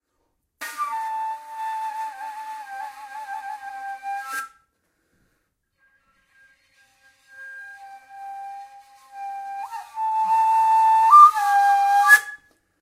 Kaval Play 04
Recording of an improvised play with Macedonian Kaval
Kaval, Macedonian